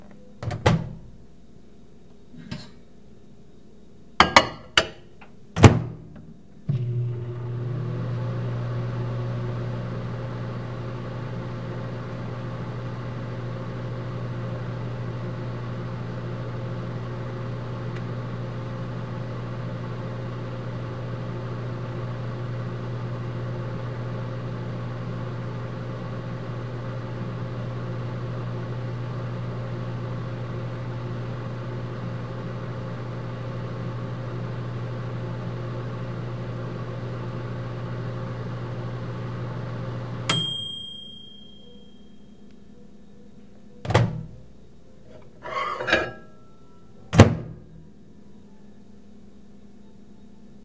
Heating up my lunch. Open microwave, put in plate, turn on microwave, wait, ding, open microwave, get food, close microwave.
Recorded with Rode NTG-2 mic into Zoom H4.
appliance, bell, ding, drone, kitchen, life, lunch, machine, microwave, plate